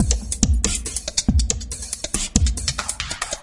70 bpm drum loop made with Hydrogen